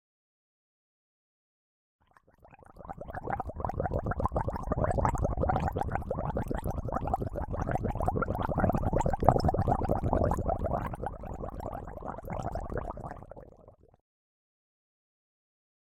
16-1 Gargle close
Gargle in mouth
bathroom, CZ, Czech, gargle, mouth, Panska, water